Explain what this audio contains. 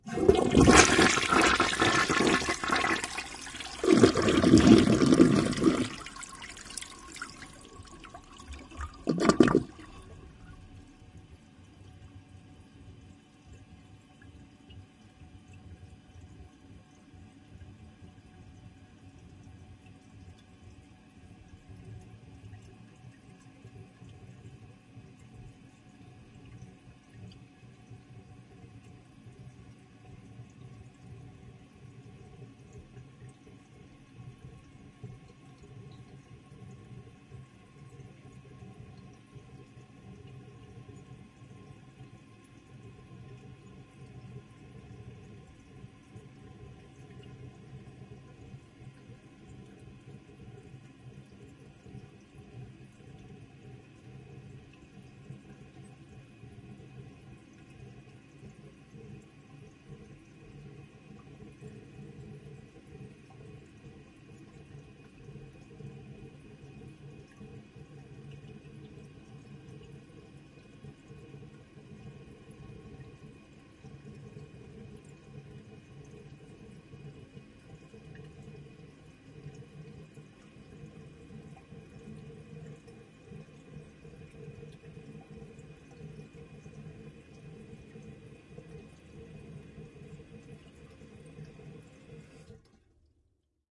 Here's a toilet from my home in Greensboro, North Carolina, United States, from November 2007. I used a Zoom h4 recorder and a set of Cad M179 studio condenser microphones.
flush, glug, gurgle, toilet, water, wet